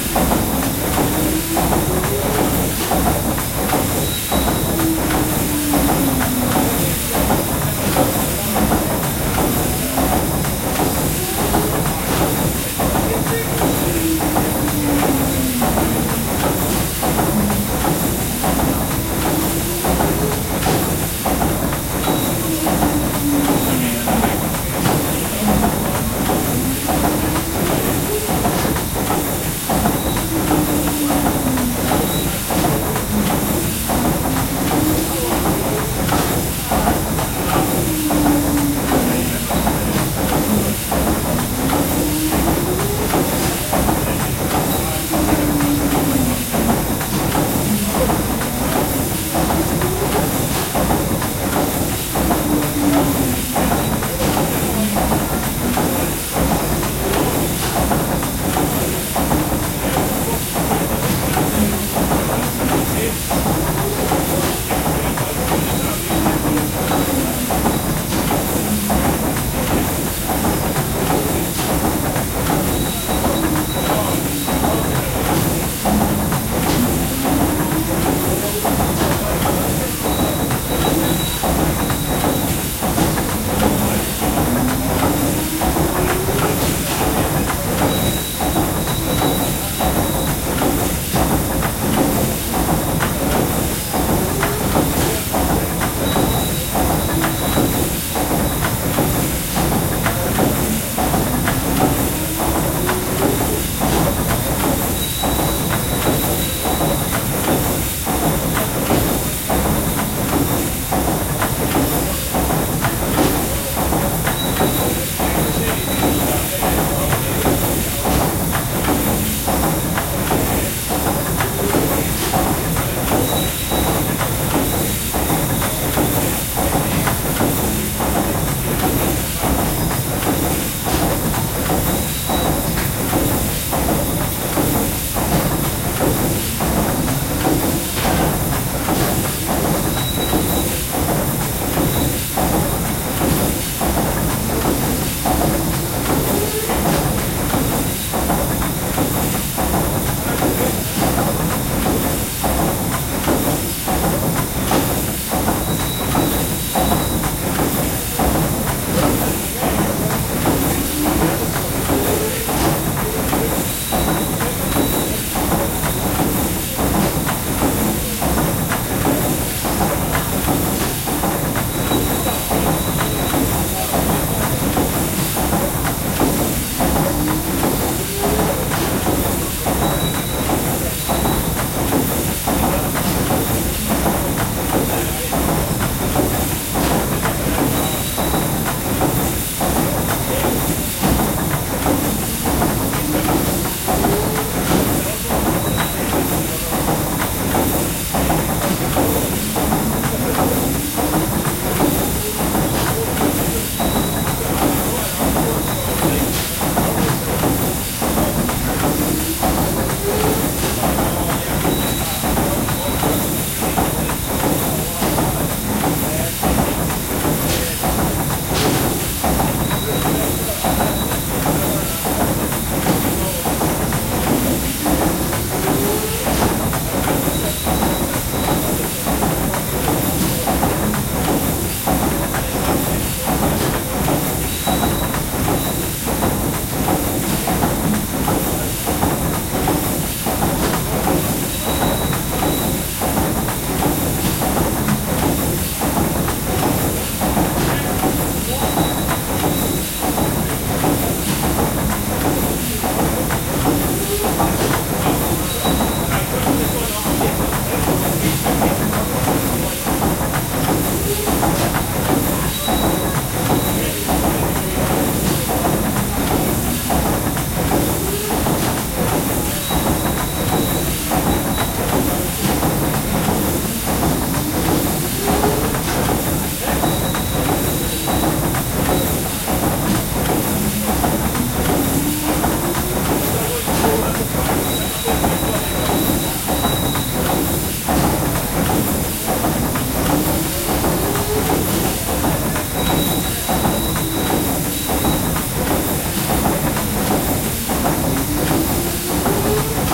Paddle steamer engine - long
The engine of the PS Waverley paddle steamer, spinning and whirring along.
Also available in a 30-second version.
Recorded on Zoom iQ7.
boat, engine, mechanical, whirring